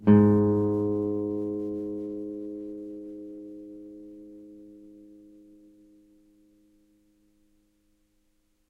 G#, on a nylon strung guitar. belongs to samplepack "Notes on nylon guitar".
nylon, strings